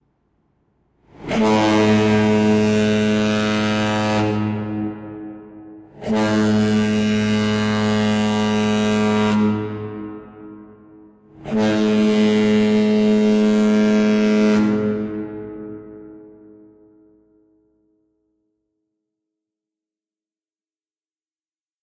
What's been Edited:
Removed Ocean Noise
Added Reverb
Slowed Track down
More Bass